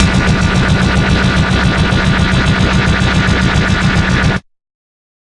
37-16th Dubstep Bass c3
Dubstep Bass: 110 BPM wobble at 1/16th note, half of the samples as a sine LFO and saw LFO descending. Sampled in Ableton using massive, compression using PSP Compressor2. Random presets with LFO settings on key parts, and very little other effects used, mostly so this sample can be re-sampled. 110 BPM so it can be pitched up which is usually better then having to pitch samples down.